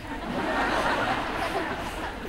A big crowd of people laughing. Recorded with Sony HI-MD walkman MZ-NH1 minidisc recorder and a pair of binaural microphones.